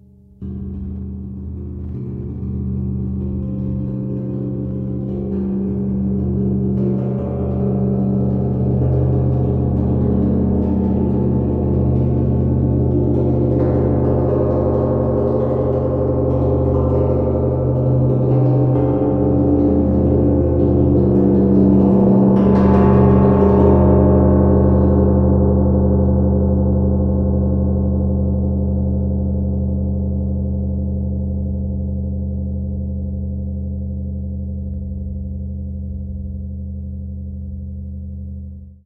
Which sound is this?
Deep gong rumble.
metal, Zarathustra, cymbal, gong, build, deep, crescendo